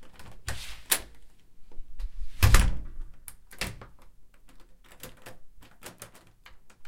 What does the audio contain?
Door Open:Close, Weather Stripping
Front door with weather stripping on the bottom opening and closing
Close, Door, Open